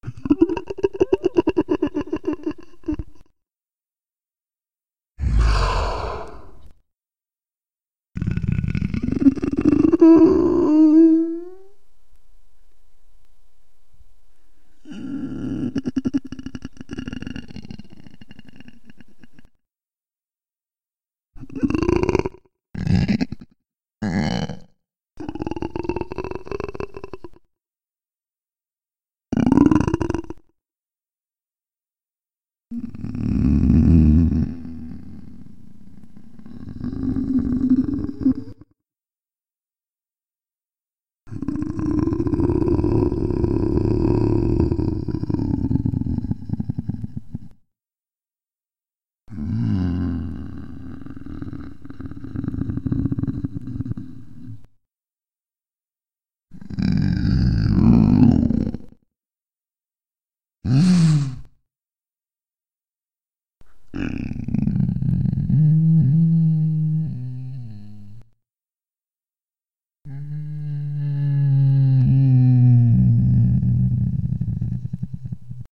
Free Zombie Moan Sounds
groan, horror, moaning, Zombie